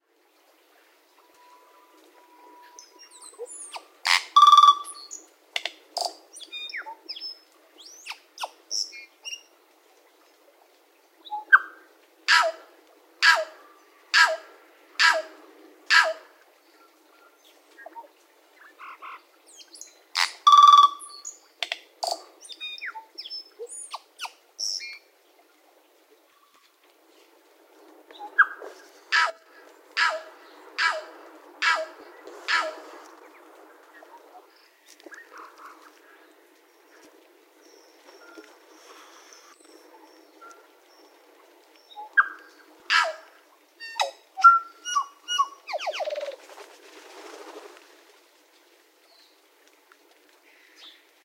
A cleaner version of boziav's recording. They are also excellent mimics, the first one I ever heard convinced me that a train was coming towards me!
bird, birdprosthemadera-novaeseelandiae, birds, mono, new-zealand, tui